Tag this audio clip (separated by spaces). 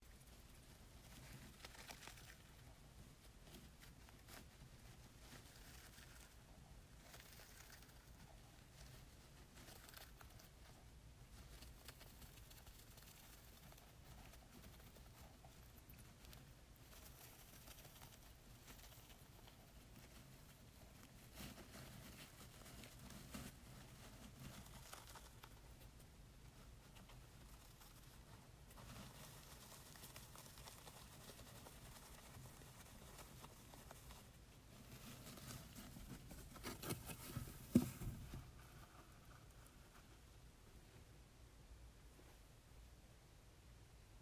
animal hamster nest rodent scratching small